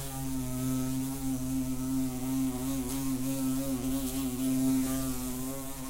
Bee Wasp
This sample loops reasonably well and has very little background noise, which is why I created it.
bee, animal, buzzing, hornet, wasp, real-life, insect